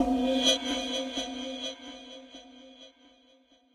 Alchemy Short FX 01
Sound generated in Alchemy synthesizer recorded to disk in Logic Pro X. Using Sample-based synthesis with two oscillators through its own four-bus effects engine.
fx, alchemy